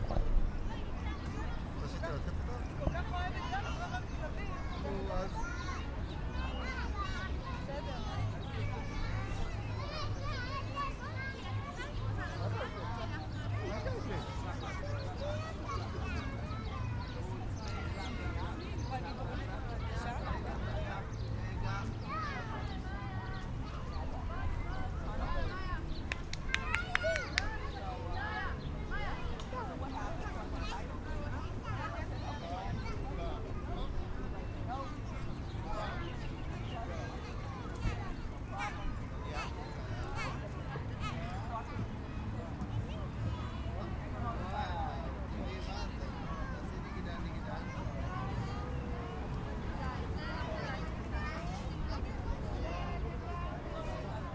city park Tel Aviv Israel
city park in Tel Aviv Israel
ambience, park, field-recording, city, playground